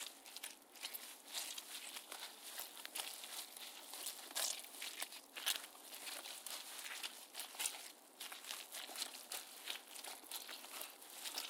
Recorded using a Zoom H4n.
Squelching footsteps on wet mud.